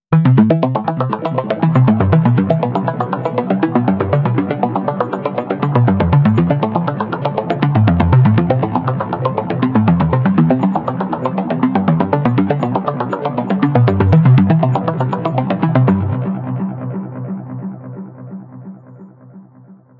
ARP D - var 9
ARPS D - I took a self created sound from the Virtual Korg MS20 VSTi within Cubase, played some chords on a track and used the build in arpeggiator of Cubase 5 to create a nice arpeggio. I used several distortion, delay, reverb and phaser effects to create 9 variations. 8 bar loop with an added 9th and 10th bar for the tail at 4/4 120 BPM. Enjoy!
bass, harmonic, melodic, sequence